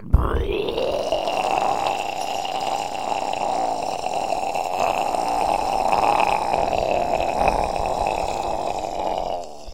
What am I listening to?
It's me making a "monster" voice. The record is not processed. Belongs to the pack Human Voice.
monster, human, repulsive, male, noisy